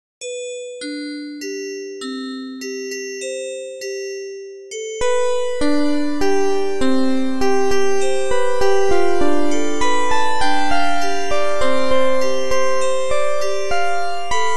Happy Night (Loop)
Hello Darkness, my old friend.
Short 8-bit music loop made in Bosca Ceoil.
(Edited version of Happy Day to better suit night time)